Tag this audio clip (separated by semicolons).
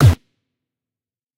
Kick; compressors; lo-fi; sample